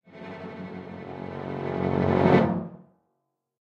Dark Crescendo 1
A dark crescendo made in Logic Pro X.
I'd love to see it!
brass, cinematic, creepy, dark, dramatic, haunted, hit, horror, melodic, moment, music, musical, orchestral, spooky, stab, sting, strings, suspense, terror, transition, tremolo